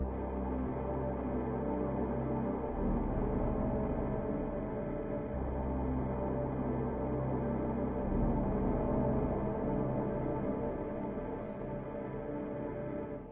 landoforcs90bpm
Siren based pad layered with some light/high padsounds.Ambient texture. 90 bpm 4/4. Duration: 5 bars.